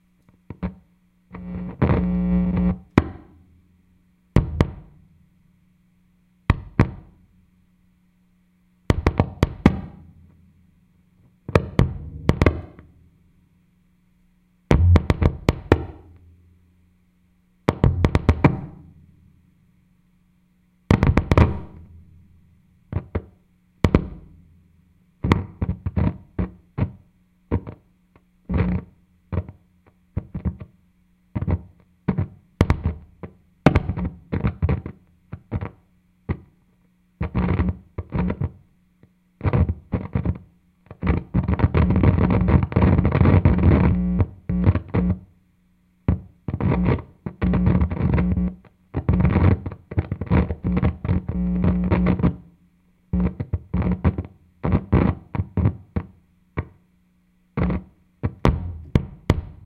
jack pull01
This is the ambient sound of a bass guitar being plugged and unplugged from a Traynor 2-15 tube amp. I sort of play with it a bit, so there are various examples of the sound.
Recorded in stereo using a Tascam DR-05 about 1' in front of the amp.
cord, crunch, electric, guitar, noise, static, unplugged